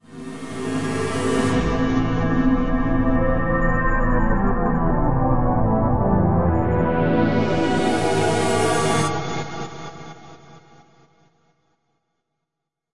A short phrase generated in u-he's new (November, 2011) software synthesizer Diva, recorded to disk in Logic and further processed in BIAS Peak.